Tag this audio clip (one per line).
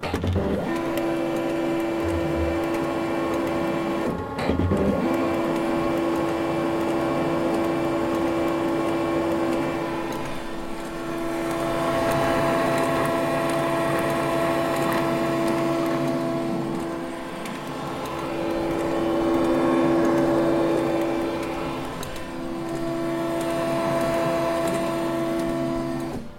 factory
mechanical
roll